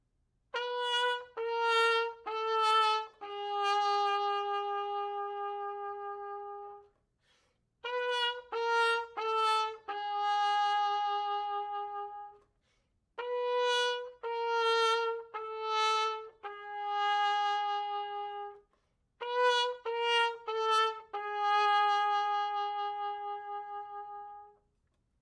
Trumpet sad chromatic wah wah
Several variations on the classic trumpet "wah wah" effect